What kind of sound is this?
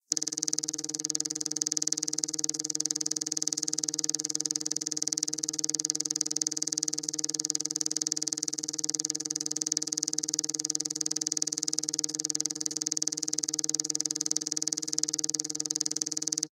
The sound of text appearing on screen to display location information as seen in movie blockbusters like The Bourne Identity. You always hear this slight pulsating sound as the characters of the text appear on screen. Works best if you have the text appearing letter by letter, not all at once.
Although a mention in the title sequence would be appreciated but it's not necessary. Hope you find it useful.